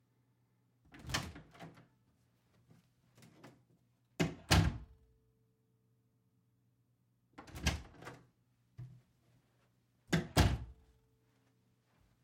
Door Open & Close 1 (On-Axis)
Recording of a door being opened and closed, and then opened and closed again. Very slight footsteps (on carpet) can be heard. The microphone (Sennheiser MKH-50) was intentionally placed about 5 feet away from the door so as to capture some of the natural room sound/reverb (which is very subtle). I have another recording available of the exact same door and mic setup, but aimed slightly off-axis to capture just a bit more room sound.
Recorded into a Tascam 208i audio interface and into Adobe Audition. Very minor processing (low-cut at 80Hz to remove low-freq rumble).
clean, door, close, wooden, metal, handle, open, space, repeat, opening, doorknob, shut, foley, office, dry, closing